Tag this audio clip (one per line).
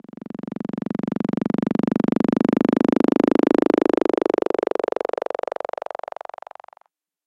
fm
synth
glitch
noise
modular
nord
funny